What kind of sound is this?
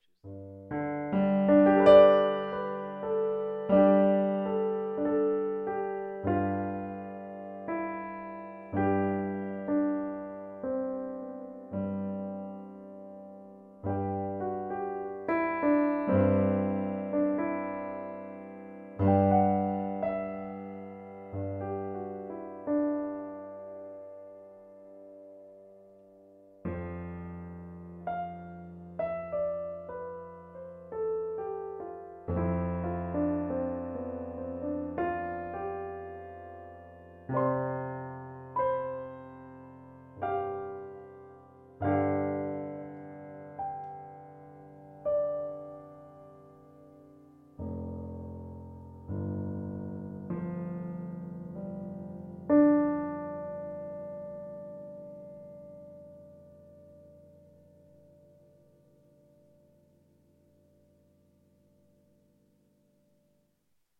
Melodic piano released as part of an EP.